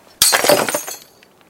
Includes some slight background noise of wind. Recorded with a black Sony IC voice recorder.